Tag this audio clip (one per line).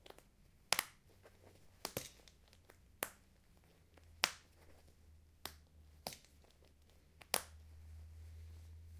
botton
click
snap-fasteners
clothing-and-accessories